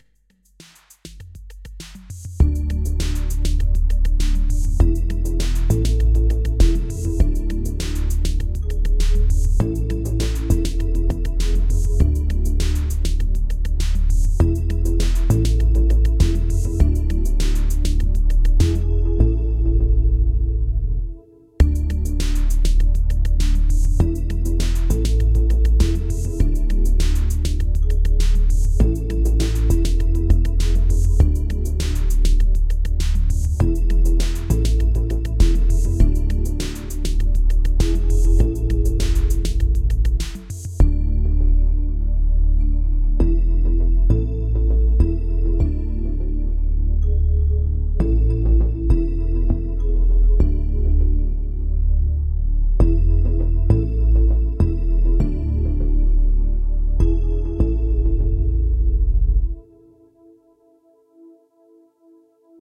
happy thoughts beat
happy, feelgood, upbeats, loop, beat